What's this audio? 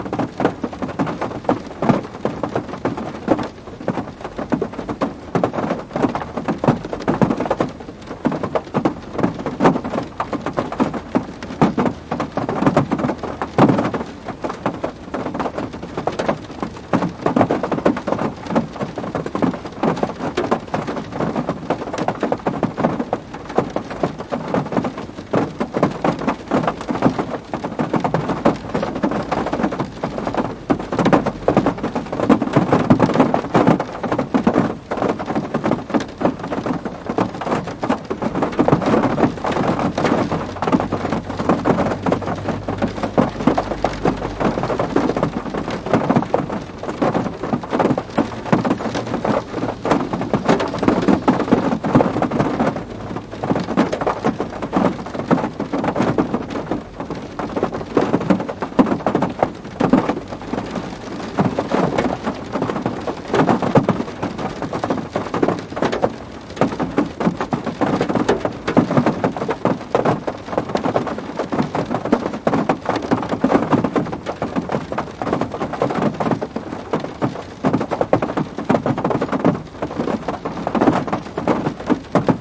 Recording of rain hitting the car. Recorded from inside the car with Zoom recorder and external stereo microphones